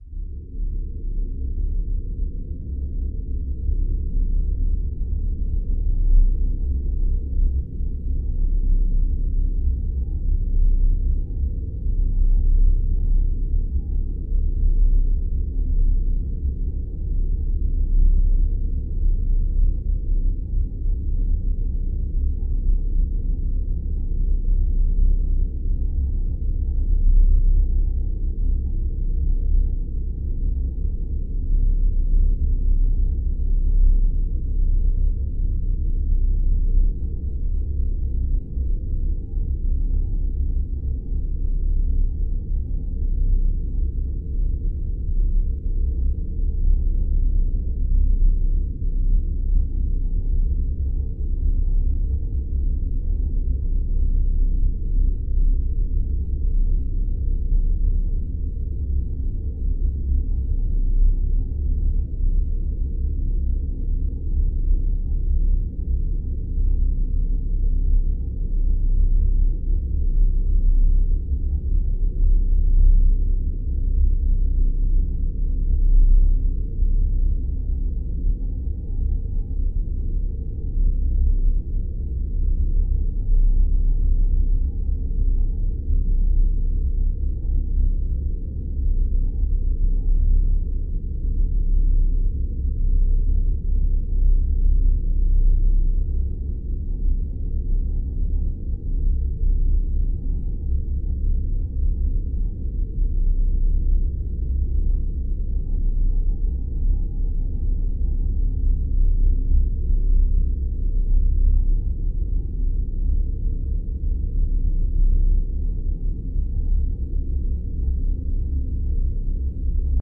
spaceship engineroom 1
Sound atmosphere of spaceship engineroom.